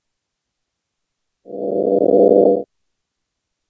Electric Arc
Is a typical electric sound that you hear if a high voltage spark is created.
Created with "pure data" (with my own patch which bases on Pulsar Synthesis) and recorded with Audacity.
arc, electric, electric-arc, high-voltage, ladder-sound, sparks